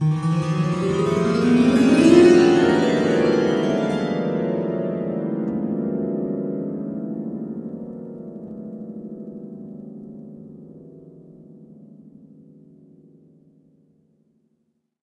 piano harp up 2
Grand piano harp glissando recorded on Logic Pro using a Tascam US-122L and an SM58